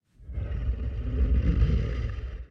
Bear growl, emulated using human voice and vocal transformer
creature, monster, horror, bear, inhale, breath, breathing, animal, scary, breathe, growl, beast, roar